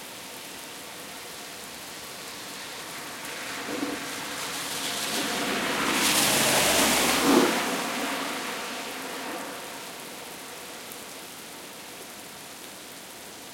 doppler coche lluvia 4
rain, car